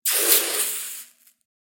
Hot Knife Quenched in Water
Hot knife quenched in cold water.
boil, cool, drown, sizzle